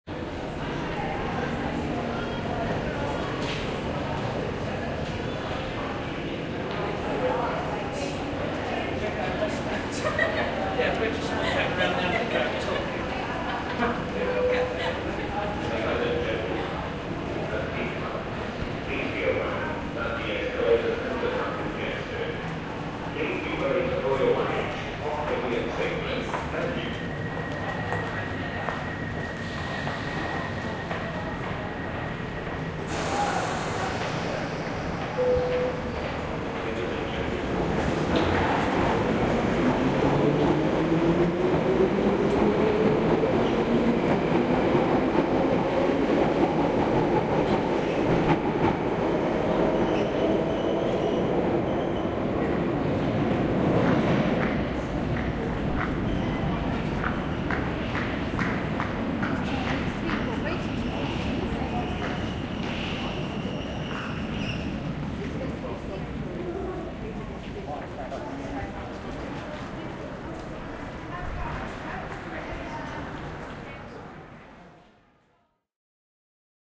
808 Kings Cross Underground 8
The sounds of an underground train station; passenger voices, a departing train, footsteps, an announcement. Recorded in London Underground at Kings Cross station.
field-recording, london-underground, speech, announcement, tube, underground, london